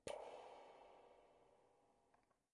poing sound
a simple recording with an edirol r9 of a plastic toy microfone. the microfone produces this sound when someone beats it and I found it a very funny sound
plastic, toy, funny, poing